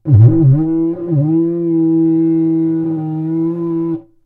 Cardboard tube from Christmas wrapping paper recorded with Behringer B1 through UB802 to Reaper and edited in Wavosaur. Edit in your own loop points if you dare. This was supposed to be named "conchshell" like the giant sea snail. Grammatical and audioacoustic FAIL.
brass, multisample, trumpet